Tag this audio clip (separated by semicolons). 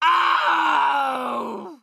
Wilhelm-scream; yell; scream; painfull